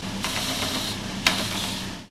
mono field recording made using a homemade mic
in a machine shop, sounds like filename--drilling into a broken record!